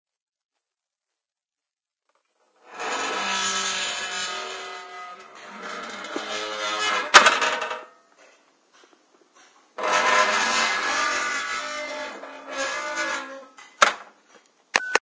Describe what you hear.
rusty metal gate
clang, gate, iron, metal, metal-door, metal-gate, metallic, rusty, rusty-gate, rusty-metal-gate, steel